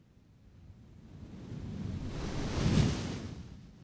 meteor fly-by 1
Microphone Used: Tascam DR07-MKII
DAW Used: Audacity & Reaper
Objects Used: Used breathing gently into microphone alongside a few whistles with the rustle of tin foil, paper and cling film and brown noise. Pitch slide was added alongside an envelope to create the fly-over effect.
flyby, meteor, Fly-by, woosh, fire, falling